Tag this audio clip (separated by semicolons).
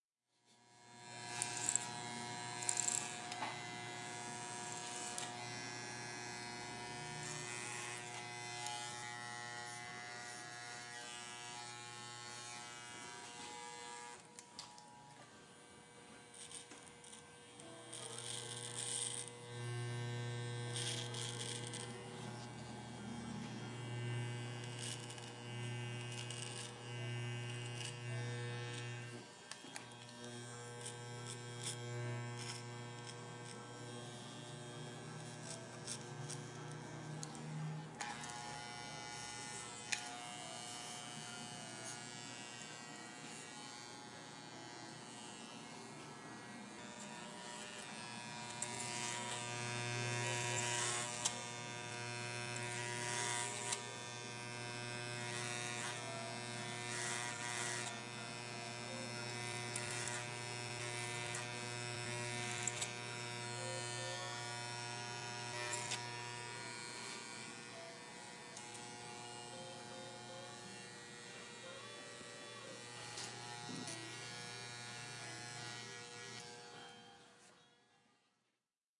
clippercut; haircut; hair-cut; barbershop; clipper-cut; hair; barber-shop; cut; hair-cutting; barber; haircutting; cut-hair; clippers